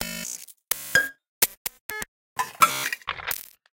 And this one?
BuzzyPercussion 127bpm03 LoopCache AbstractPercussion
Abstract Percussion Loop made from field recorded found sounds
Abstract, Loop, Percussion